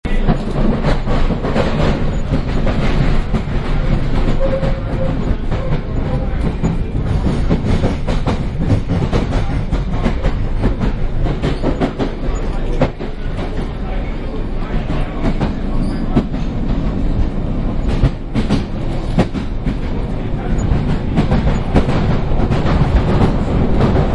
Bakerloo Line Ambience - Overground 2